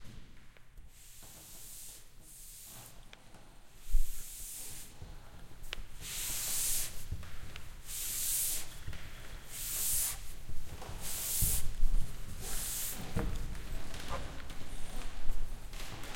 13 Blackboard cleaning
Blackboard cleaning school
school, cleaning, blackboard